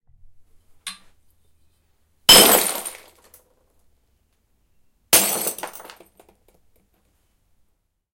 This Foley sample was recorded with a Zoom H4n, edited in Ableton Live 9 and Mastered in Studio One.
sound, field-recording, movement, mic, Foley, microphone, design, glass, rustle, bottle